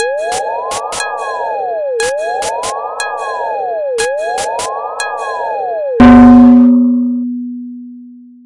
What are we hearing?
LIOTTARD Alexandra 2017 2018 space siren
This sound is totally created with Audacity.
In first step I have generated a white noise.
After that, I have generated a risset drum. Where I have added an amplification.
I have generated too a pluck. Where I have added a reverberation.
Furthermore, I have generated a Chirp where I added a reverberation too, and I have reversed the sound and after that I have repeated this track 3 times.
Each addition is made on different mono tracks
Typologie/morphologie de P. Schaeffer
Nous avons des itérations variées (V’’), avec des itérations complexes (X’’), et un son continu varié (V)
1- Masse :
Cette musique est composée de sons canelés, en effet, il y a des sons toniques et des sons complexes présents sur la même bande sonore.
2- Timbre harmonique :
Il s’agit d’un son relativement acide
3- Grain :
Le son est relativement lisse, avec quelques rugosités
4- Allure :
sci-fi, synth, ambience, music, electronic